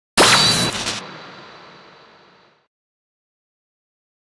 blizzard FX anime 80s cheesy 3
80s, anime, blizzard, cartoon, cartoonish, cheesy, fantasy, fx, processed, scifi